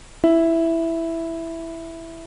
Mi, Notes, Piano
Mi, Piano, Notes